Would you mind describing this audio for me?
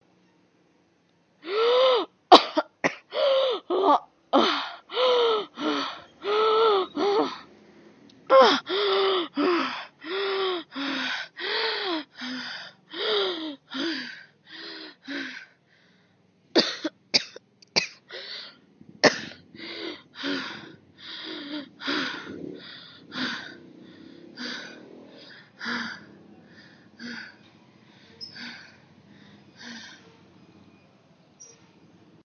Just me recording my voice. If your going to use it.
Just a random sound effect I did when I got a little bored.
voice, panting, gasp, pant, woman, female, gasping, cough, coughing, human